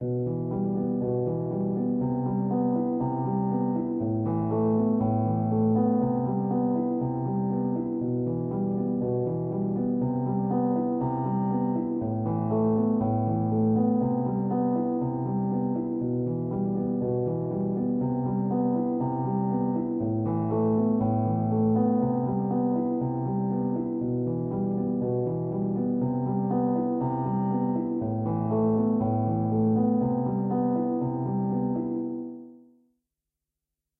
Piano loops 032 octave down short loop 120 bpm
reverb, 120bpm, simple, bpm, simplesamples, 120, Piano, samples, free, loop